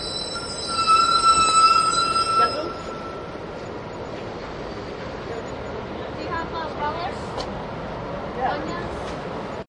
nyc esb hotdogstand
At a hot dog stand in New York City recorded with DS-40 and edited in Wavosaur.
ambiance, field-recording, urban, new-york-city